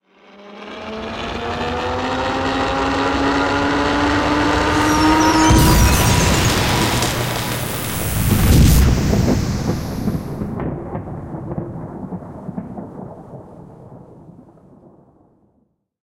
chronosphere-ish
"zeezack" requested a teleportation sound that resembled the Chronosphere from an old strategy game. Mostly using sounds I had on hand - this is pretty close. I could have gotten closer if I used some of my sound effects libraries - but I didn't. The only sound that wasn't mine was:
sci-fi, soundeffect